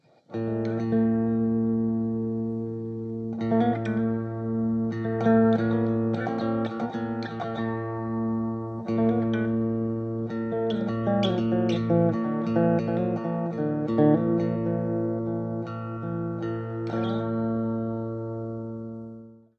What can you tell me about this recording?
guitar transition 01
Guitar-based musical transition element, a short riff with a minor sound played over open strings.
Recorded on a Epiphone Sheraton Pro II into a Mixpre 6 (with a Radial Pro48 DI for the passive pickup), then not much processing, bit of eq and reverb.
transition, clean, riff, guitar